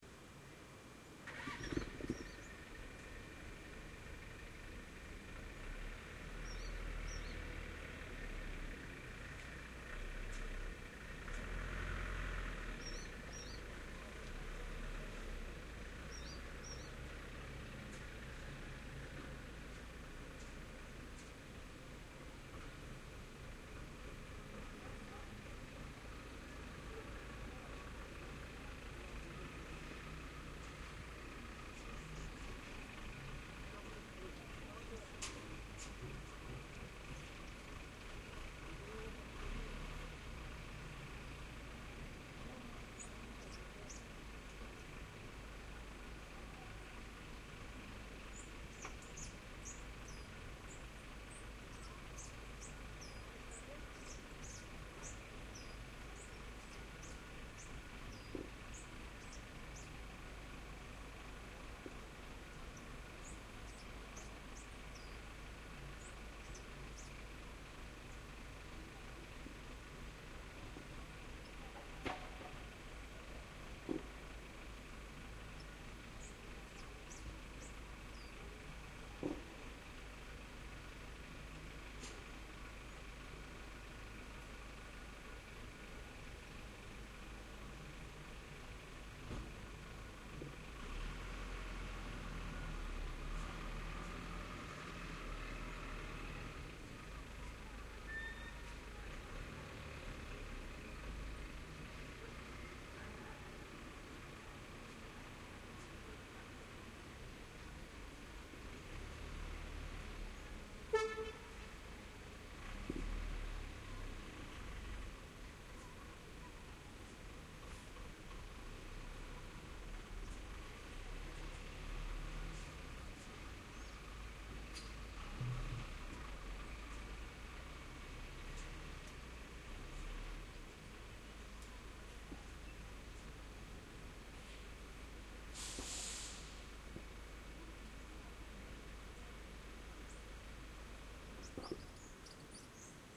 120619 03 Dump Truck Motor 1
Taking apart a stone wall next door. The dump truck starts its engine and moves. Recorded on a Canon s21s
dump-truck, hauling, motor